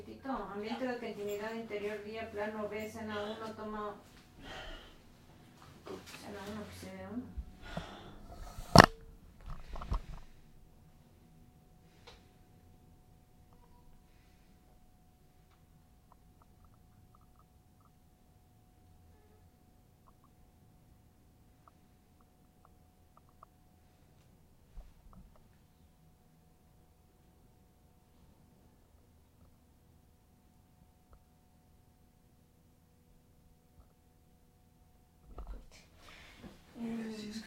Ambiente ciudad noche
Ambiente de la parte central norte de la Ciudad de Quito-Ecuador en la noche. This sound
it´s mine. Was recorded with my Nh4 in the film "La Huesuda" in Quito-Ecuador. It´s Totally and definitly free.
Interior-Zona, Norte-Quito, Centro